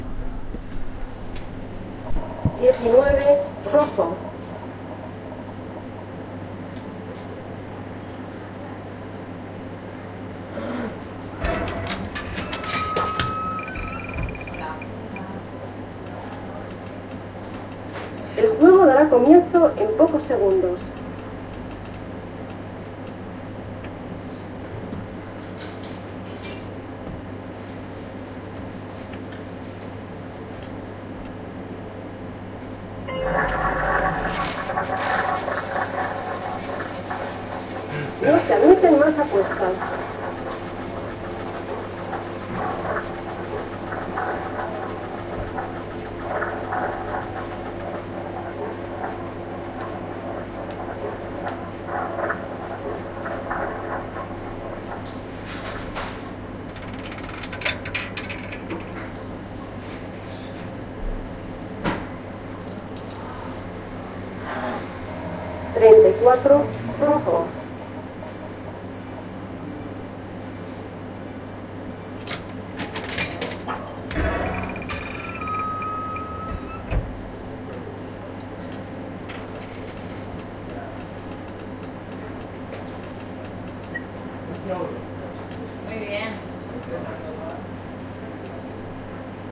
Sounds of a roulette and typical sounds of bets. Gameroom